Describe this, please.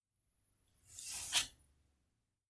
the sound of taking a paper which was on a table